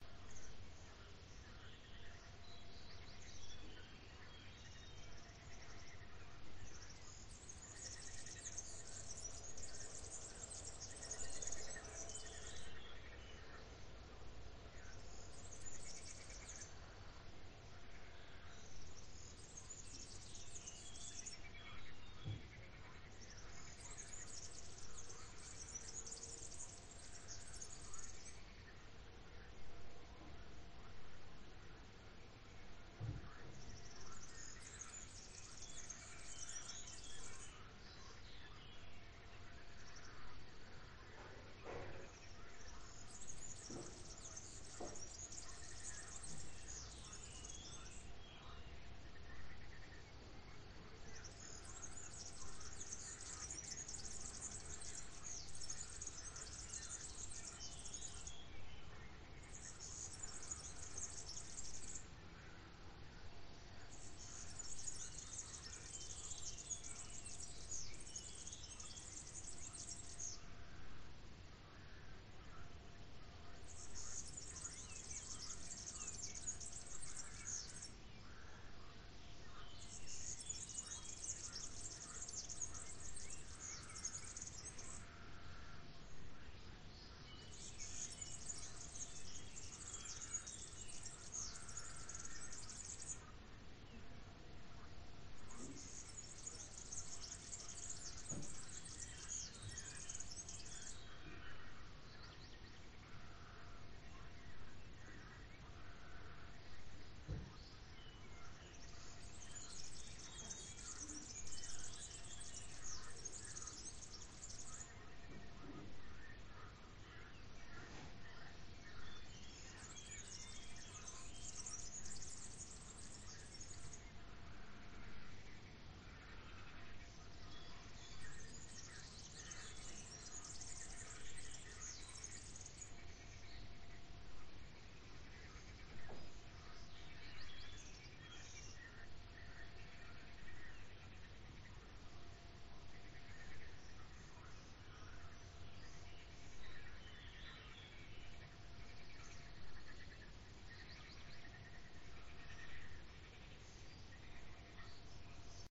Voegel Froesche Person arbeitet
Lots of birds are singing, frogs are croaking, somebody is working in the background.
Recorded with ZoomH2N in South France, Region of Ardèche.
ambience, atmos, atmosphere